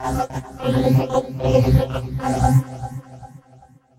THE REAL VIRUS 11 - VOCOLOOPY - C3
A rhythmic loop with vocal synth artifacts. All done on my Virus TI. Sequencing done within Cubase 5, audio editing within Wavelab 6.
loop, multisample, vocal, vocoded